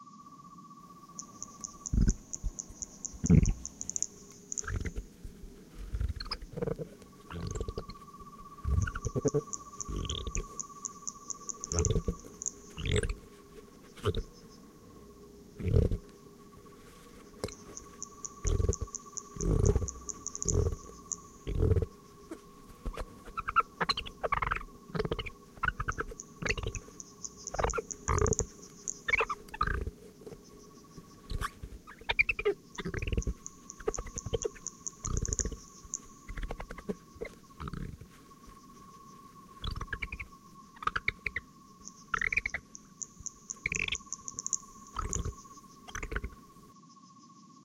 Alien Crickets
crickets, night, chirp, ambience, insects, sci-fi, bugs, alien